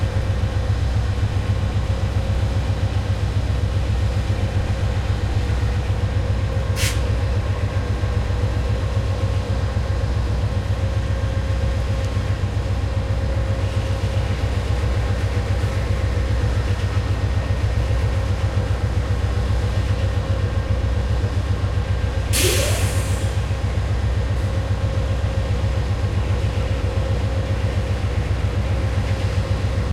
Part of the Dallas/Toulon Soundscape Exchange Project
Date: 1-25-2011
Location: Dallas, Union Station near tracks
Temporal Density: 3
Polyphonic Density: 3
Busyness: 4
Chaos: 4